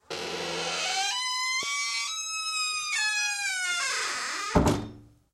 Door being closed
This sound was recorded in Laspuña (Huesca). It was recorded with a Zoom H2 recorder. The sound consists on a door squeaking while it is being closed
Closing, Door, Gate, Squeaking, UPF-CS12